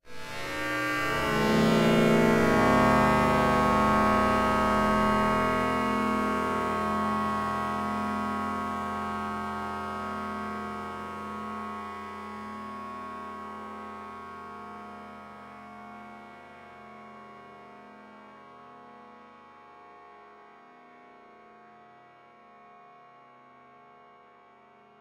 Slow Aalto3
This pack comprises a series of sounds I programmed in the Aalto software synthesizer designed by Randy Jones of Madrona Labs. All the sounds are from the same patch but each have varying degrees of processing and time-stretching. The Slow Aalto sound (with no numeric suffix) is the closest to the unprocessed patch, which very roughly emulated a prepared piano.
Aalto, prepared-piano, processed, time-stretched, Madrona-Labs, soft-synth, electronic